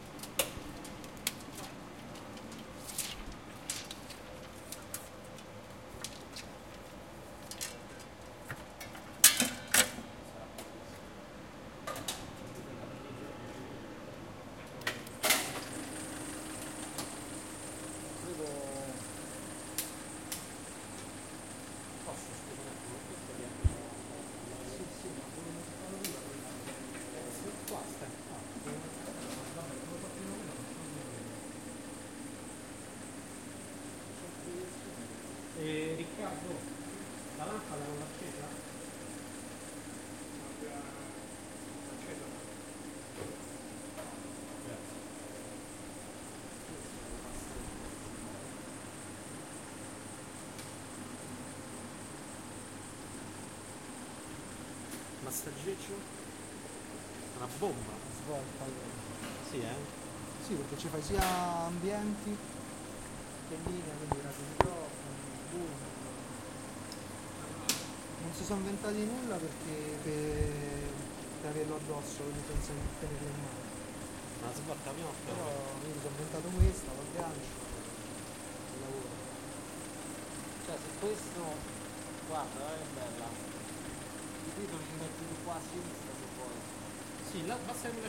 film projector 02
cinecitt, film